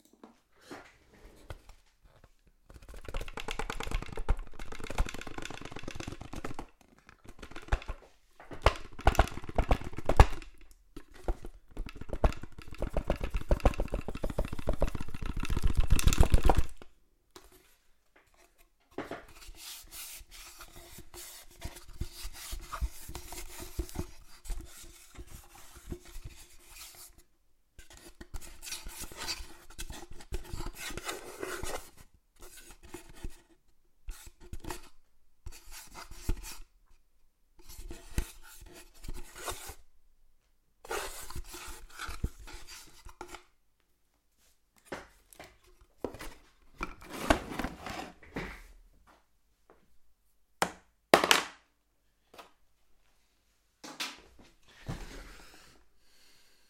wooden box with hinge shake
Shaking and swiping a wooden box
box, build, clear, de, design, element, focusrite, forte, frenquency, high, hinge, low, make, nt1-a, organic, percussive, quality, r, recording, shake, sound, trepidation, versatile, wood, wooden